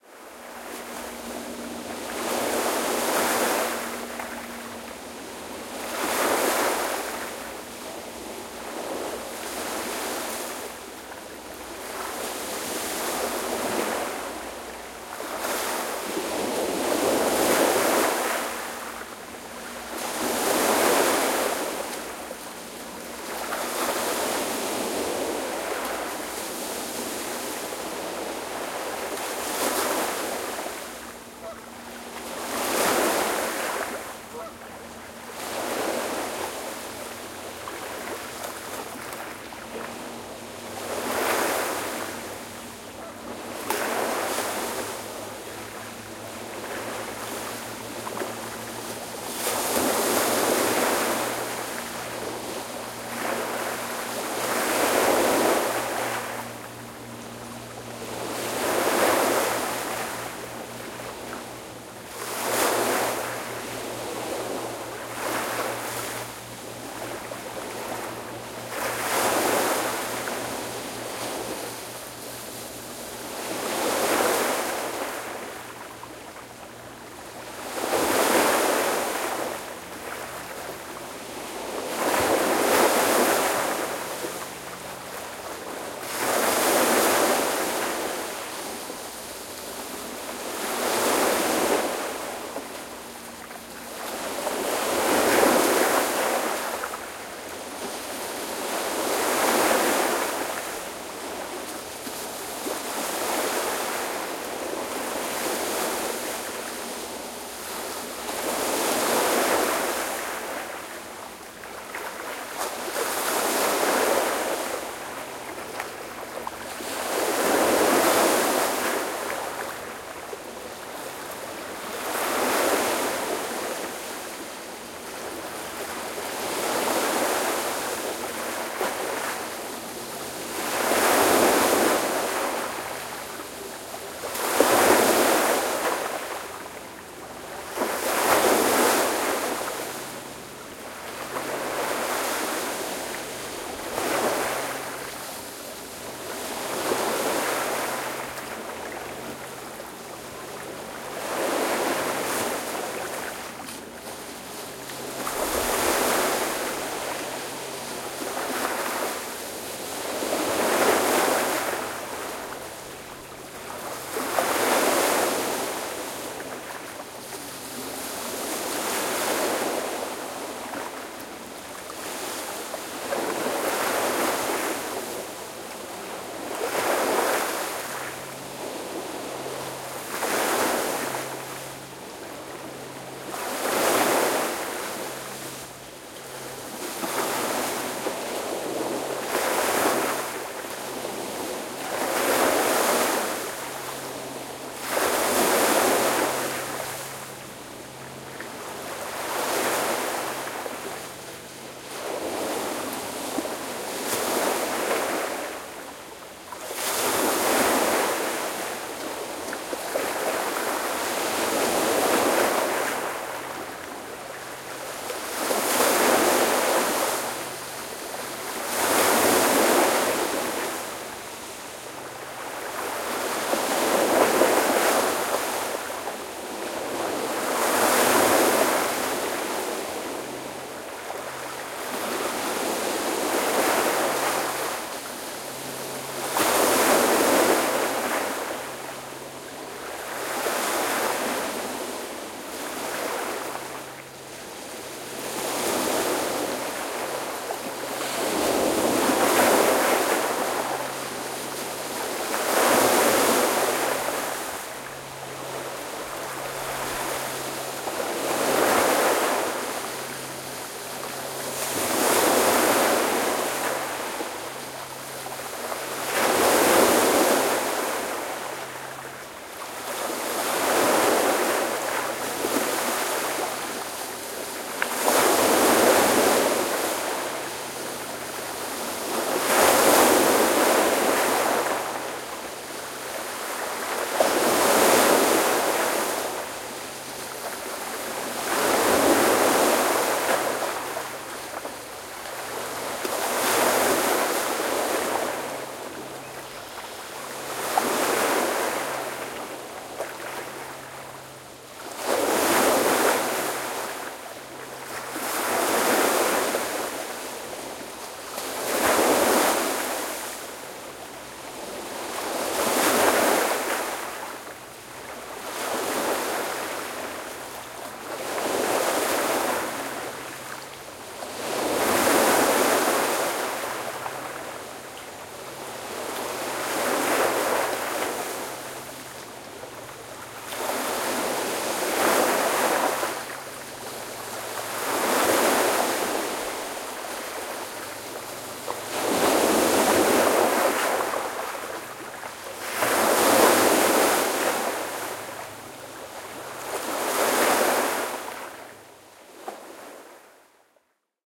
Ocean Lake Sea Shore Waves
This is a field recording at a Sea Shore. You will hear the waves breaking and occasionally some sea gulls.